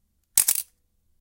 The sound of the Focal TLR 35mm camera with a shutter speed of 1/15 second